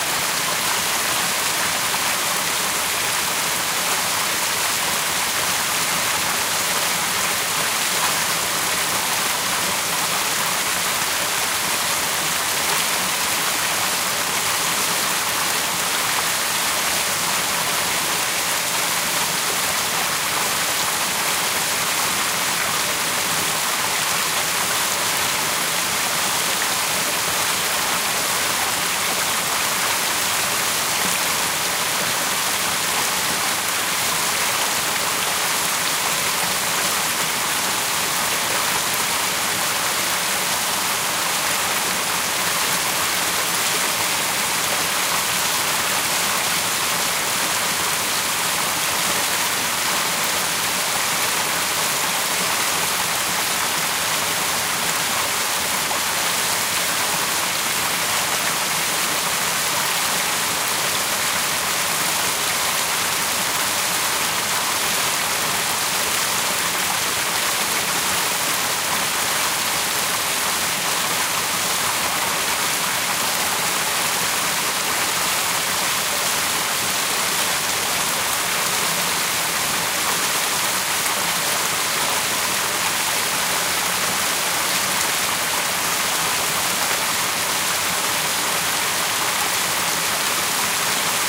Creek River Closer
Normally this is a small creek in the forest - but this time after 4 Days of constant rain, the creek became a river - and ran wildly through the forest. This pack contains different recordings from further away and close up of the flowing creek. So could be useful for a nice soundmontage of getting closer to a waterstream or hearing iht from a distance.....